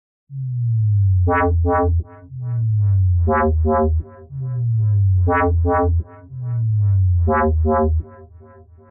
similar to sytrus1 but it has a tune
deep, digital, electronic, experimental, fx, horror, sample, sound-effect, space